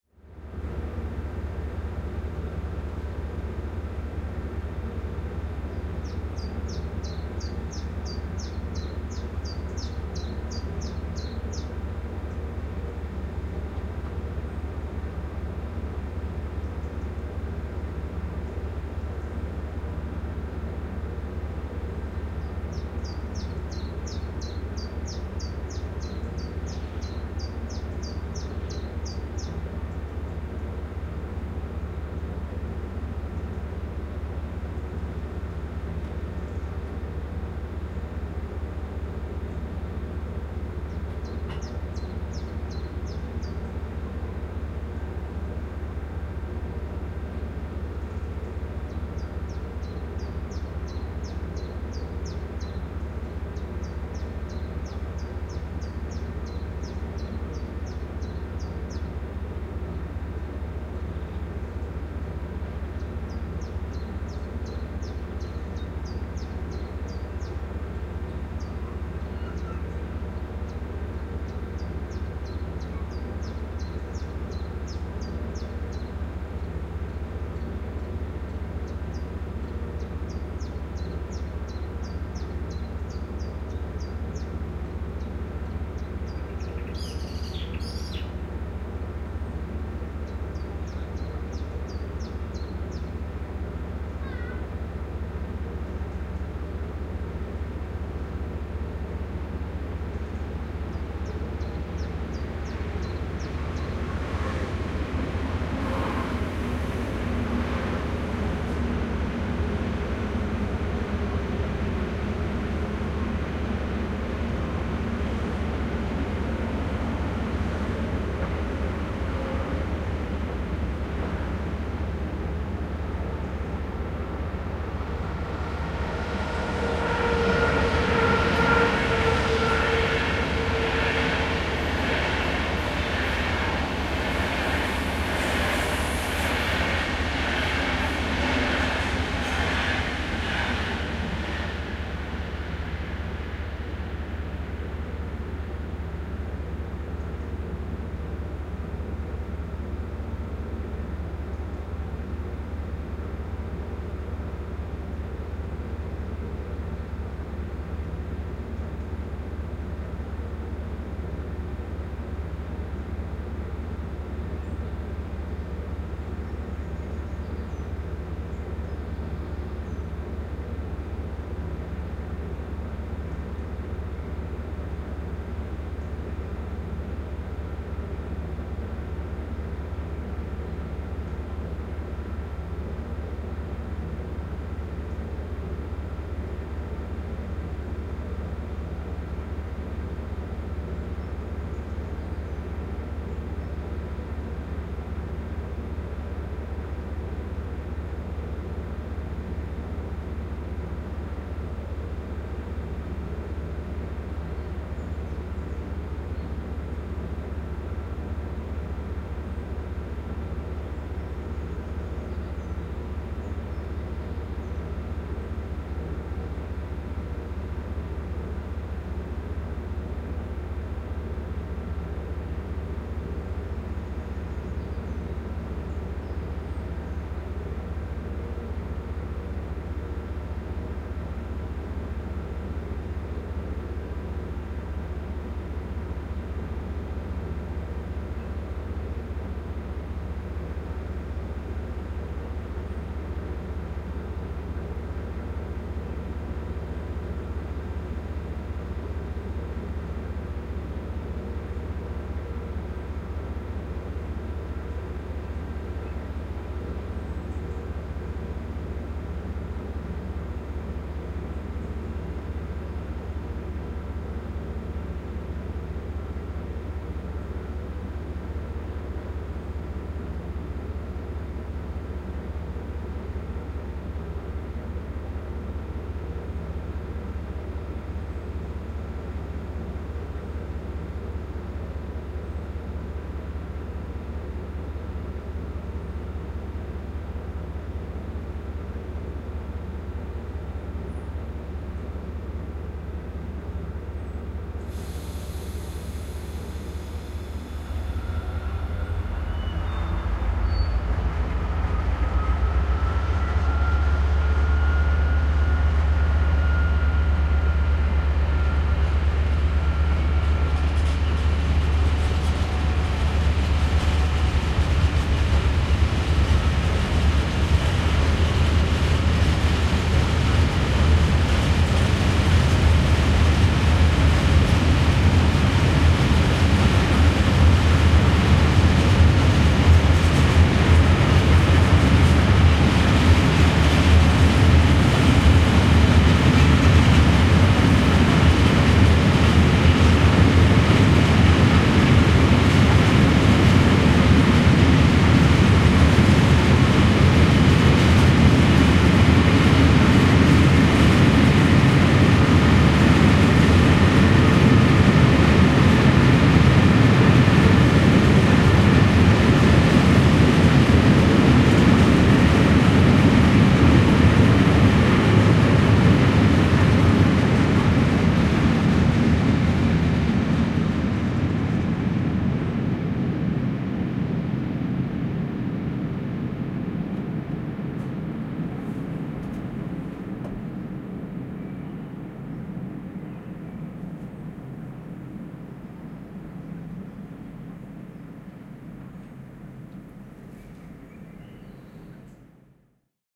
Gueterzug Bremen
a stopped freight train in Bremen, germany, the engine running idle. while the train waits for a green signal, two passenger trains pass. after that the freight train starts. It´s summer (june) and you here birds whistling along.